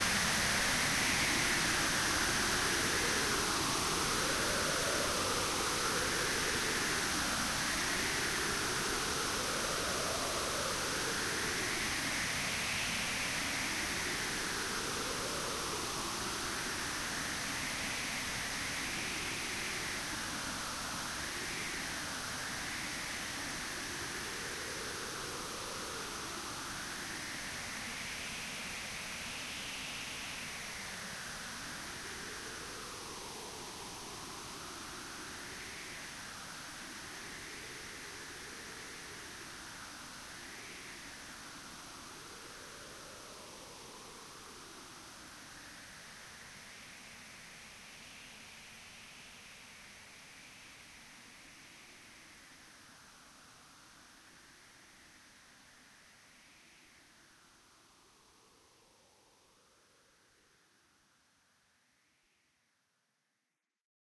Hull breach
The sound when the air is sucked out of a section of a starship.
vacuum, damage, pressure, hull, noise, drop, whoosh, breach, hole, suck, wind, rush, air